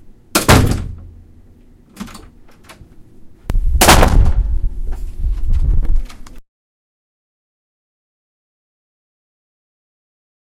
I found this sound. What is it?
recording of a heavy door closing
door-slam, door, slam